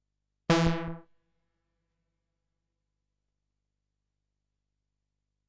A sawbass sound recorded from the mfb synth. Very useful for stepsequencing but not only. Velocity is 127.